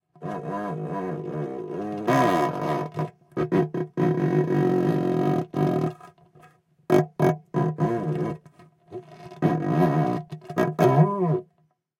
delphis CREAK PIPE
Selfmade tools where the microphone is placed into it. Mics Studio Projects S4 and RAMSA S1 (Panasonic). Record direct into Cubase4 with vst3 GATE, COMPRESSOR and LIMITER. Samples are not edit. Used pvc pipes, guitar strings, balloon, rubber, spring etc.
c4, creak, delphi, pipe, pipes, plastic, pvc, rubber, s1, s4, spring, string